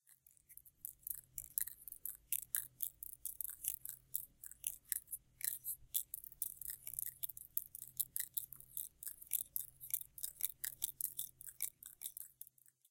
Spider Chattering
Spiders walking and chattering.
Chattering, Crawling